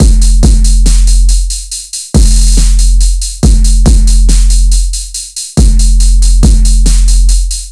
Beat aka "Face"
A simple beat-loop aka "Face".